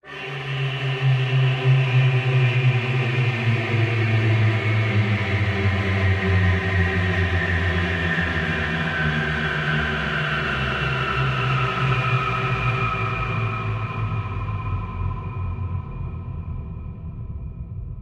Horror Sounds 9
Sinister, Thrill, Ambiance, Scary, Sound, Horror, Creepy, Evil, Spooky, Dark, Atmosphere